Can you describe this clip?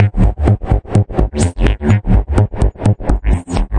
REAKTOR L3 loop 8T tremolo exponentiel
synth vsti, controler akai
field-recording, ssample